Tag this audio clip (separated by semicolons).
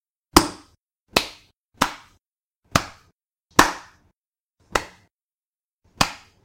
loud; percussion